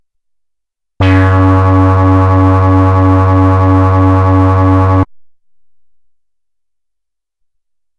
SW-PB-bass1-F#2
This is the first of five multi-sampled Little Phatty's bass sounds.
envelope, fat, bass, moog, analog